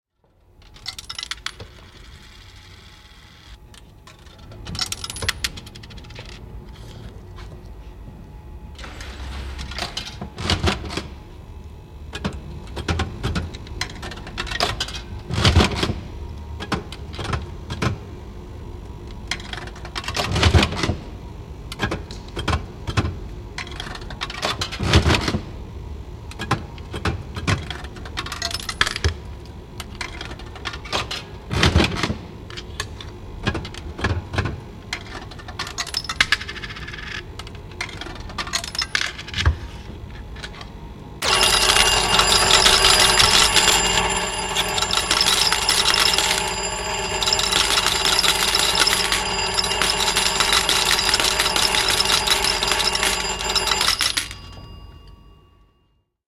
Linnanmäen peliautomaatti 1970-luvulta. Yksikätinen rosvo (Aristocrat Olympia). Mekaaninen peli. Kolikoita raha-aukkoon, vetoja kahvasta. Lopussa iso voitto, kilinää, ja kolikot putoavat kaukaloon.
Paikka/Place: Suomi / Finland / Helsinki, Linnanmäki
Aika/Date: 21.04.1975
Finnish-Broadcasting-Company, Uhkapeli, Suomi, Game, Lever-pull, Yle, Rahapeli, Coin, Gamble, Soundfx, Laite, Raha, Field-Recording, Tehosteet, Kolikot, Device, Automaatti, Laitteet, One-armed-bandit, Yleisradio, Pelaaminen, Gambling, Raha-automaatti, Machine, Money, Arcade-game, Slot-Machine, Peli, Jackpot, Finland